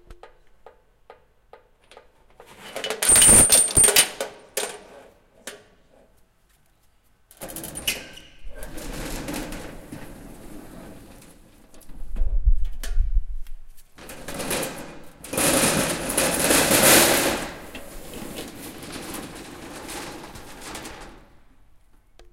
Metal Door Opening
Large metal door in theatre being opened.